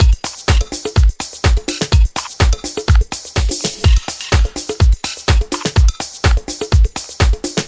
Created in Hydrogen and Korg Microsampler with samples from my personal and original library.Edit on Audacity.
beat, bpm, dance, drums, edm, fills, free, groove, hydrogen, kick, korg, library, loop, pack, pattern, sample